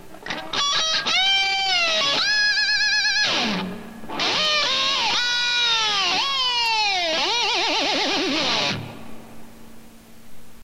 This is a my recording of a fun electric guitar sound with whammy bar acrobatics. I recorded this with a microphone using Audacity. The guitar is a Stratocaster and amplified with a tube amp.
electric-guitar, guitar